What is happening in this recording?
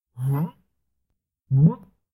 a dude with bad nausea
nausea, vomit, throw-up